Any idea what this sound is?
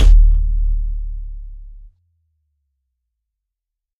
kik4a-dry
drum, experimental, hits, idm, kit, noise, samples, sounds, techno